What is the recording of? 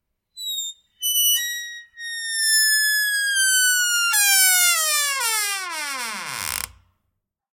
apartment door squeak
The sound of a squeaking apartment door.
Recorded with the Fostex FR-2LE and the Rode NTG-3.
squeak, creak, door, Fostex, FR-2LE, NTG-3, Rode, apartment